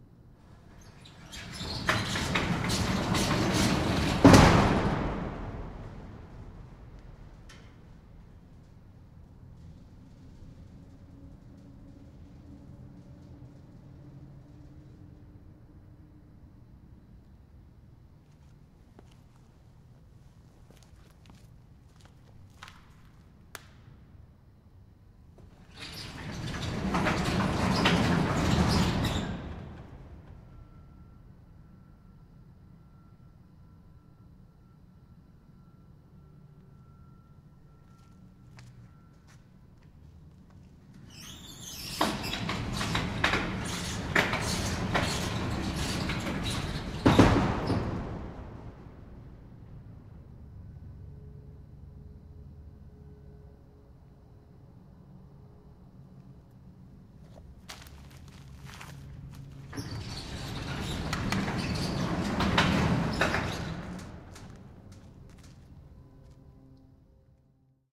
Slamming shut and opening a huge metal warehouse door on rollers. Metal on metal sounds, with huge boom at the end.
Sound Devices 722
Shure SM-57